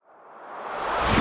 Bang Bullets Gun Smoke Transition Reverse - Nova Sound
7, Explosion, 5, bit, 8, Shooter, Sound, Digital, Explosive, Digi, Dark, Gunner, Guns, Flames, Nova, Shot, Shoot, Cyber, Arms, 8bit, 8-bit, Fire, Weapons, Firearms, Shotting, bits, Bombs